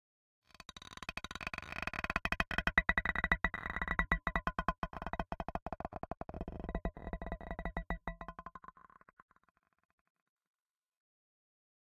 Electro stone 2
sfx, Futuristic, Mechanical, weird, loop, Alien, Noise, strange, soundeffect, sound, lo-fi, future, Space, design, effect, sci-fi, sounddesign, Stone, sound-design, Futuristic-Machines, fx, digital, abstract, electric, Electronic, freaky, UFO, Spacecraft, peb